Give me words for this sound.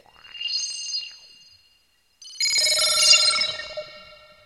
space blip2
A cute sort of spaced out alien computer bleep. Home made Nord Modular patch through a SPX90 reverb.